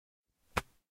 This audio represents when a SnowBall hits something.
Snow, Ball, Hit